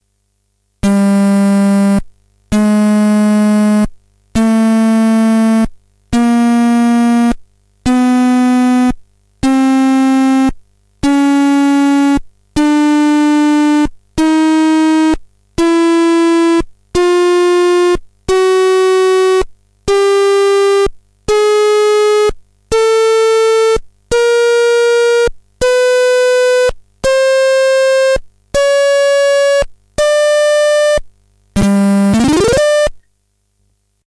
this is a multisample of my stylophone as i move up the notes then after reaching the top i sweep upwards on the notes. this is of moderate quality, is a dry signal, but it is probably badly out of tune
moderate-quality, stylophone, electronic, detuned